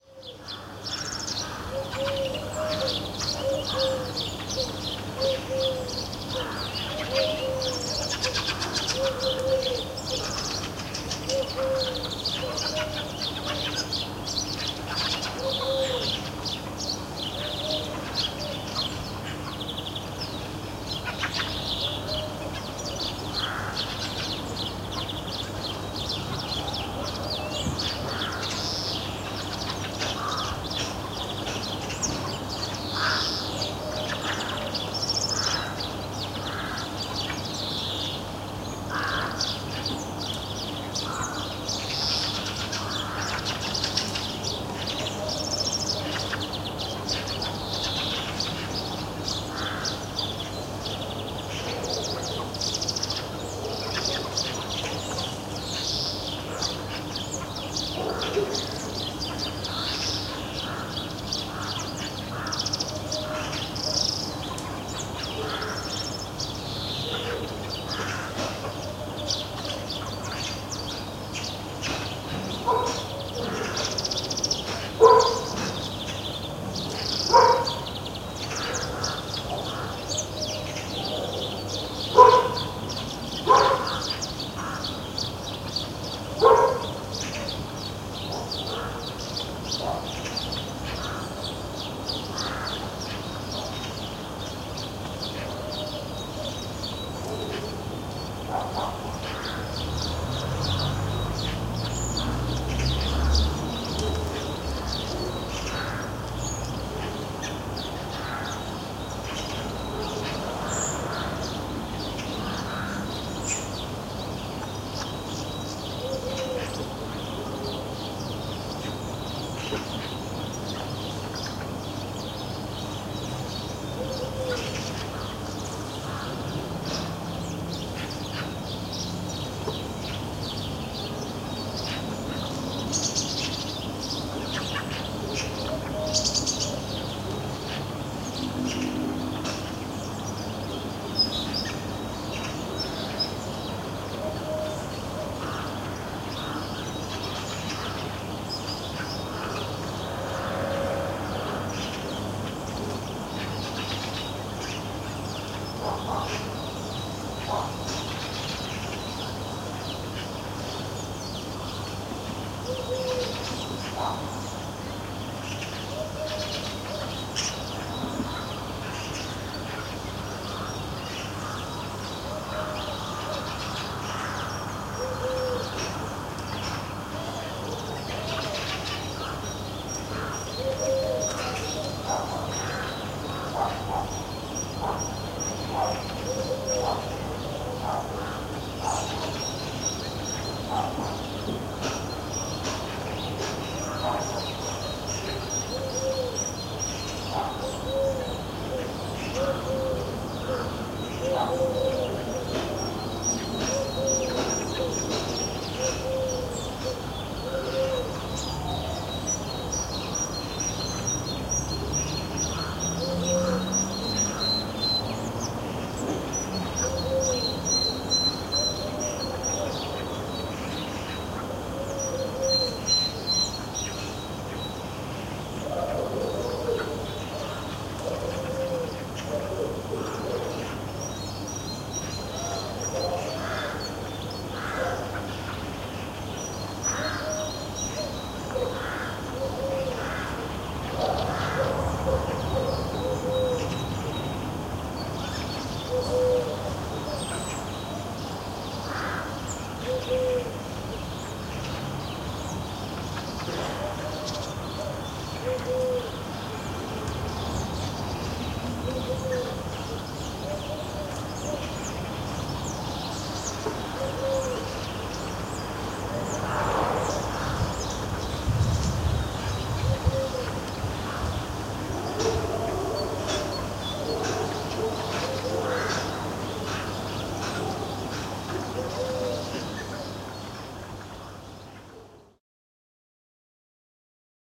A stilly street in Budapest's garden suburb. Daytime in late winter - early spring. Birds: fieldfares (Turdus pilaris), tits (Parus sp.), hooded crows and rooks (Corvus sp.), collared doves (Streptopelia decaocto), greenfinches (Carduelis chloris) etc.